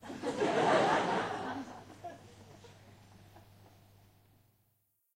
LaughLaugh in medium theatreRecorded with MD and Sony mic, above the people
czech, audience, auditorium, prague, laugh, theatre, crowd